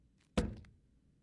Medium Thud 2
Recorded on a Tascam DR-100 using a Rode NTG2 shotgun mic.
Medium thud for a variety of uses.
medium, bounce, large, thud, ground, heavy